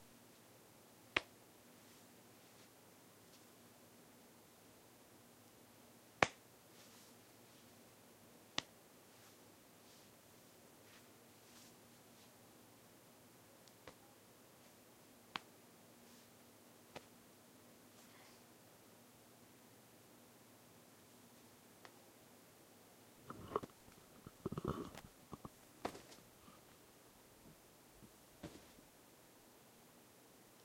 Shoulder Grab
I needed a sound where the actor grabs someone's shoulders but couldn't find the right sounding one, so I created my own! You have my permission to use this wherever you want commercials, videos, movies I don't care how you use this file/sound! :) Enjoy!
grabbing, shoulder, shirt